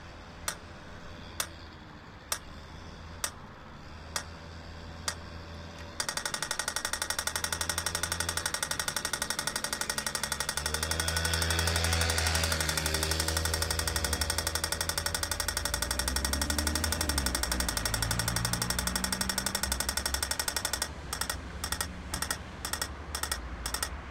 stoplicht blinde tik
Equipment: Sound Devices 722, Sennheiser MKH-415T, Rycote.
Signal
for the blind, red is the slow pulse, green is the fast pulse and the
triplets are orange (hurry up, about to go red). Recorded very close to
the sign.
blind, pedestrian